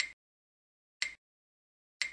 countdown-click
3 second click countdown
clock, short, ticking